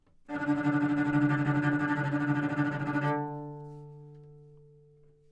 Cello - D3 - bad-dynamics-tremolo
Part of the Good-sounds dataset of monophonic instrumental sounds.
instrument::cello
note::D
octave::3
midi note::38
good-sounds-id::2053
Intentionally played as an example of bad-dynamics-tremolo